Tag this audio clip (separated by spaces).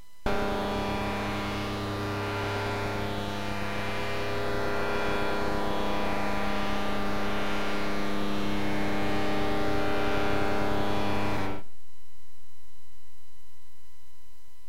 glitch circuit-bent noise